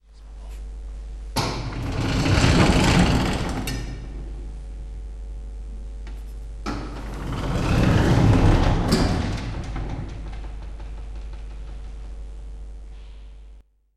tafel rauf und runter
Tafel wird nach oben und unten geschoben.
recorded on zoom H2
we push a blackboard up and down.
recorded on zoom H2
ger, klassenzimmer, schule, sfx, tafel, usche